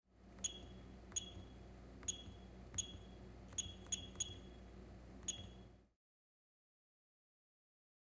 Interac machine
ZoomH1
2015
interac, cash, payment, paying, counting, machine, pay